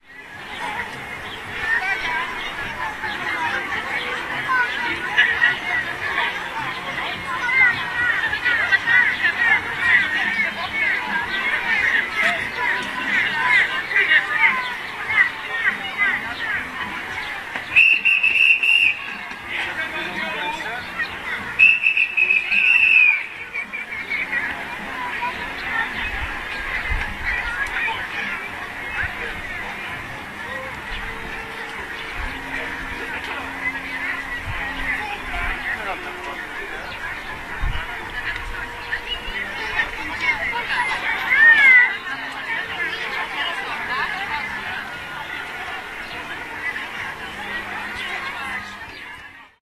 przed cyrkiem
25.04.2010: about 15.00. the Dolna Wilda street, the Jan Pawel II Park (in the center of the city of Poznan/Poland).
recording made in the front of the travelling circus called Arena after the end of the spectacle. people are leaving the circus tent: they speaking, making noises. Some clown are whistling and shouting out that some souvenirs are to collect.
poznan, people, circus, field-recording, center, crowd, park, whistle, poland, voices